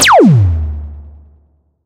I Created sound in SYNTH1 (VSTi)
TELL ME IN COMMENTS, WHERE USED MY SOUND :]
blaster, effect, fiction, game, gun, laser, movie, science, sci-fi, sf, sound, space, star, synth, wars